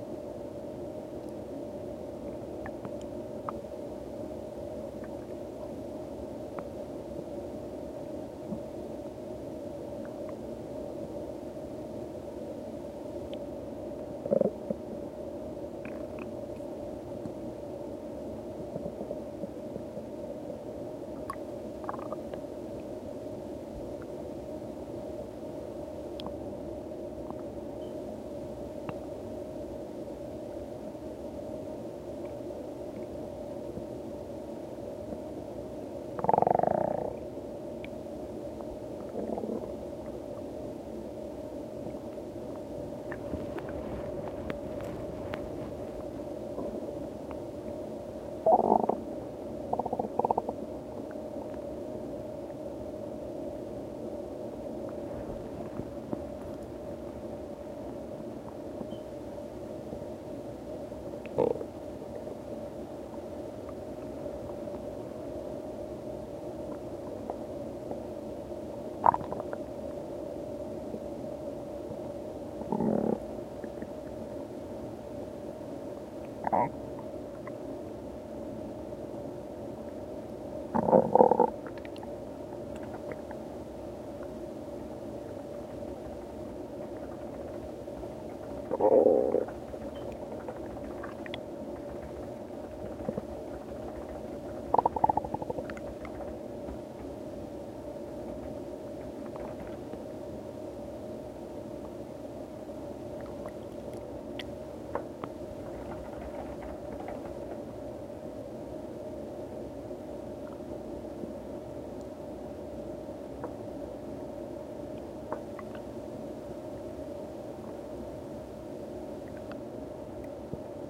Some quiet gurgling/bubbling/other words here. How do I description please help. Not sure why this one is so noisy.